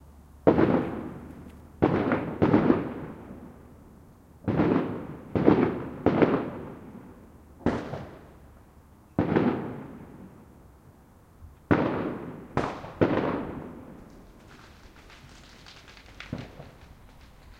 Multiple Deep Explosions (Noisy rec)
Multiple deep sounding explosions going off. This sound has background noise of a highway.
Recorded with a Tascam DR-05 Linear PCM recorder.
bang, blast, boom, cracker, explode, explosion, firework, fireworks, july, noisy, pop, pow, pyrotechnics, sharp